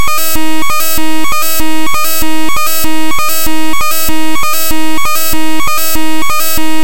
A glitchy electronic sound made from raw data in Audacity!
wave, 64, audacity, domain, public, computerized, edited, 8-bit, file, computer, bit, 8bit, 8, c64, electro, Electronic, text